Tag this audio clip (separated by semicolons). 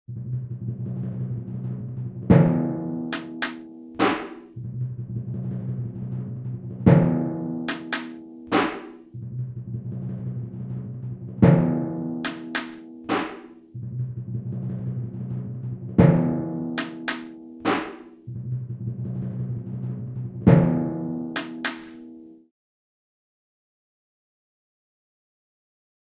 beataholic; drum; drum-loop; drums; improvised; loop; pack; percs; percussion; percussion-loop; percussive